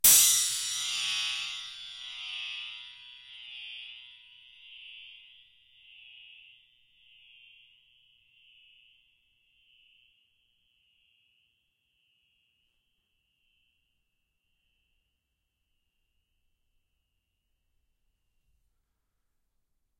A metal spring hit with a metal rod, recorded in xy with rode nt-5s on Marantz 661. Swinging backwards and forwards